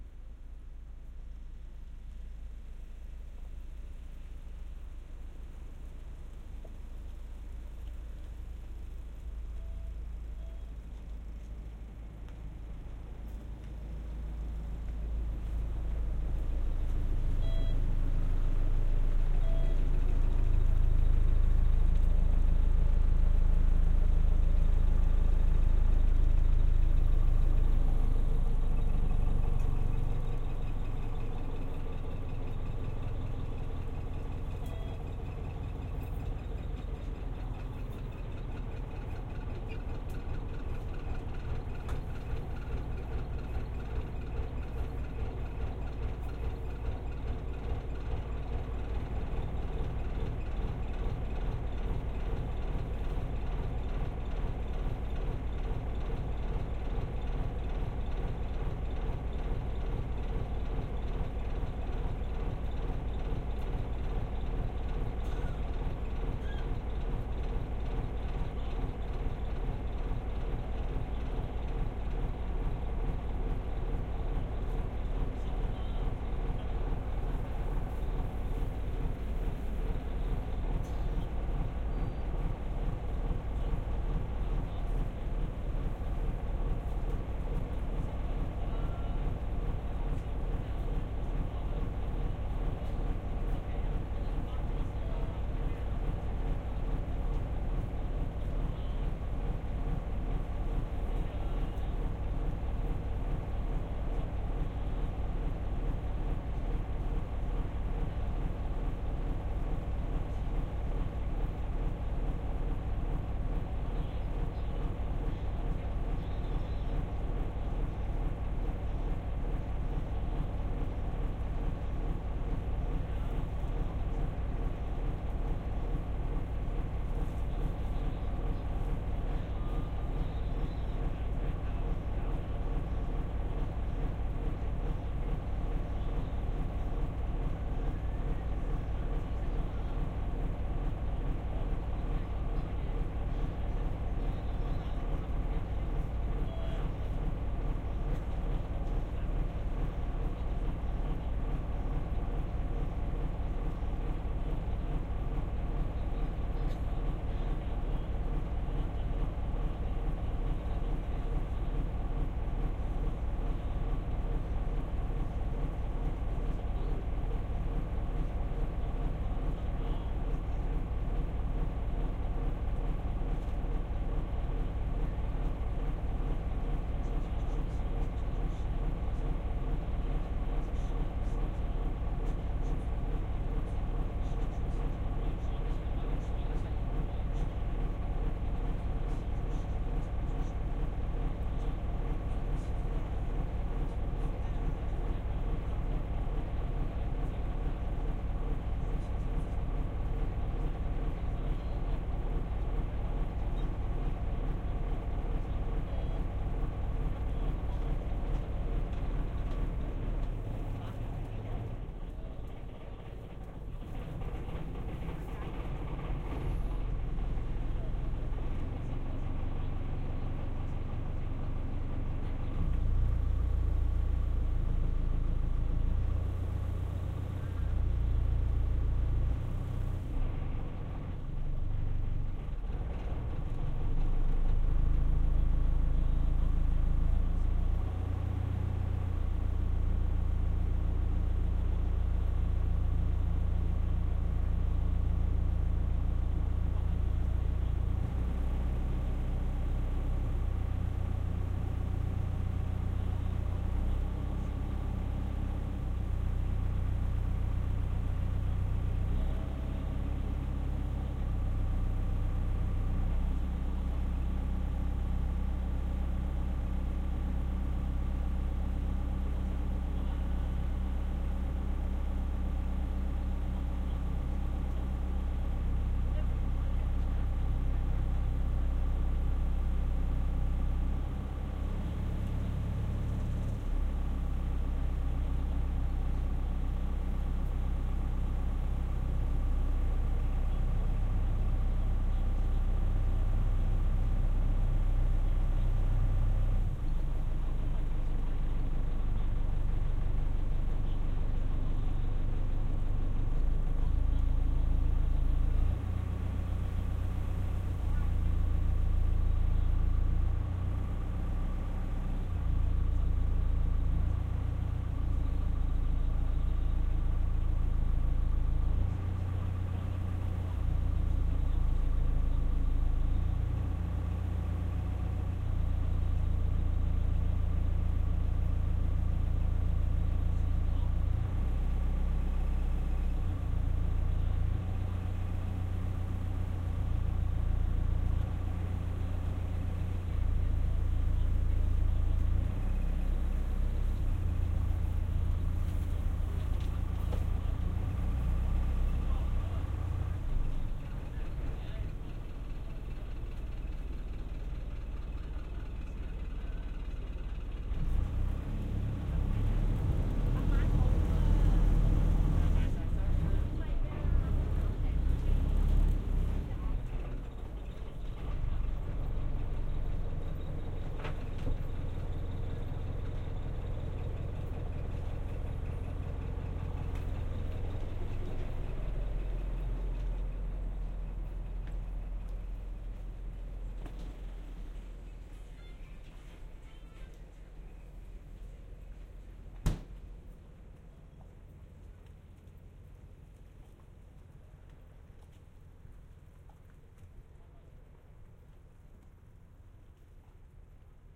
Boat 2022-05-03 19.36.33 In1
Short boat trip to cross the Aberdeen Typhoon Shelter(Aberdeen to Ap Lei Chau) in Hong Kong. Recorded with Sennheiser AMBEO Smart Headset.
field-recording, boat, Hong-Kong, Binaural